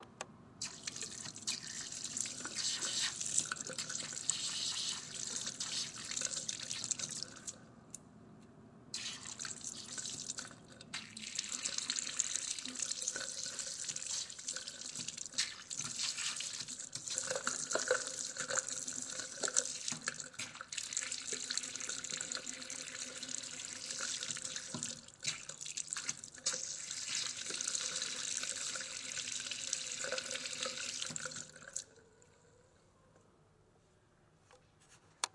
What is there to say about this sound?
Boom Bathroom WashingHands
Someone washing their hands